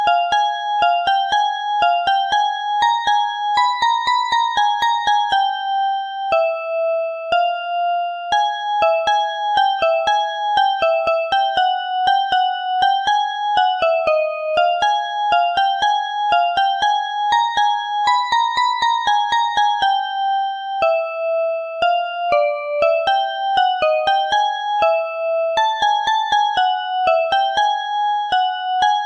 FogRun-Vincent Bebis
It's my try on using music maker apps, I don't have experience but it turned out good enough
nightmare, spooky, haunted, terrifying, creepy, music